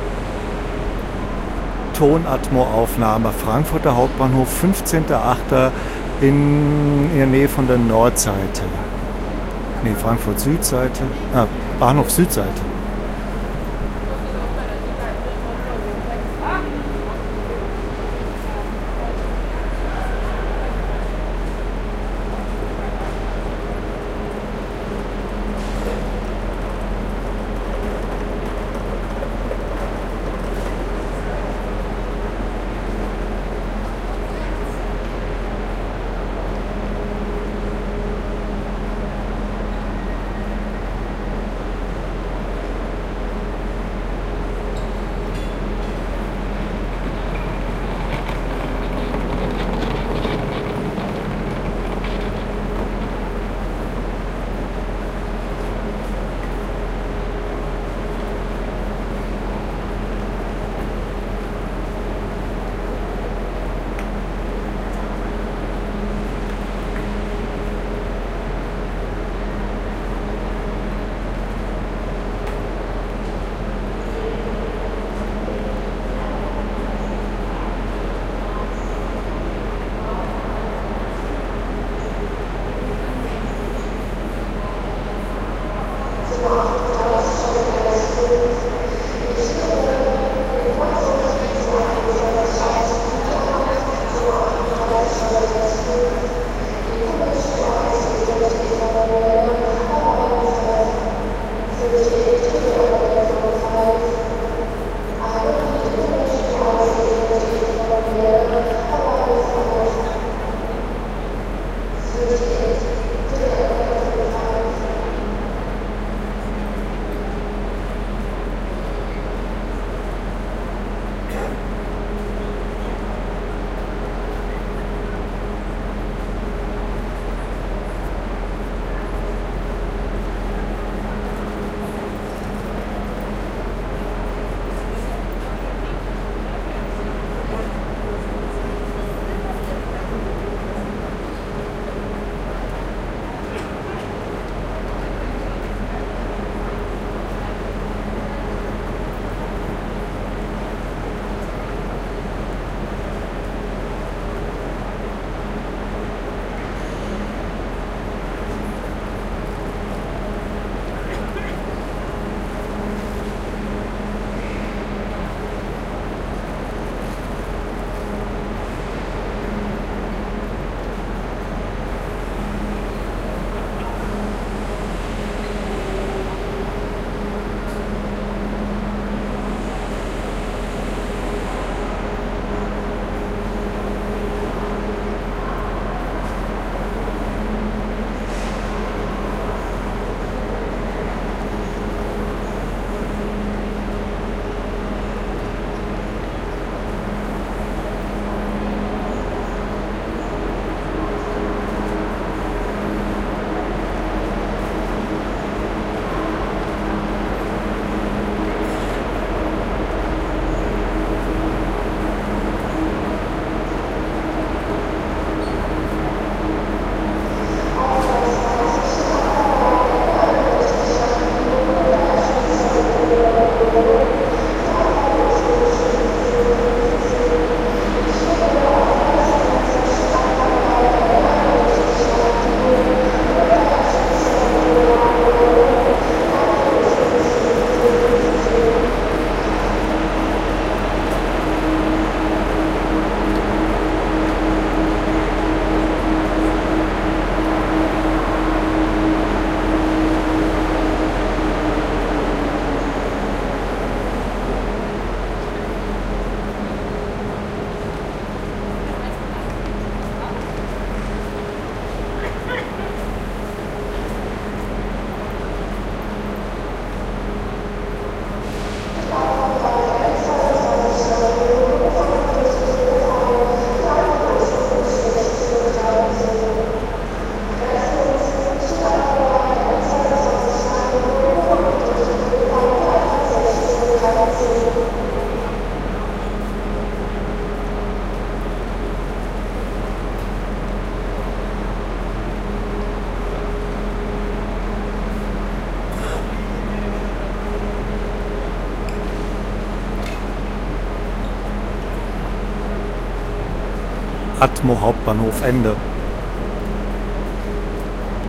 Atmo Sound in Central Station. Frankfurt/M, Germany.